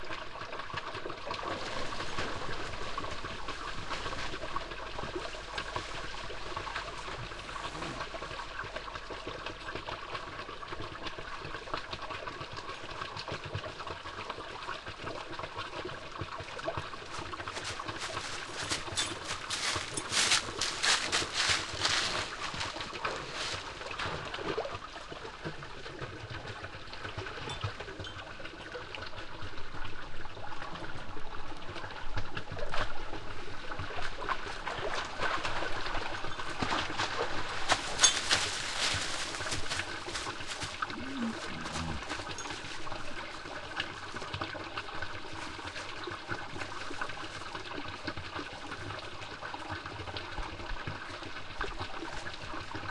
A brook in a forest in Stowe, Vermont, recorded in mid October with a Marantz PMD661 using an Audio-Technica BP4025 stereo microphone. My footsteps are audible towards the middle of the recording.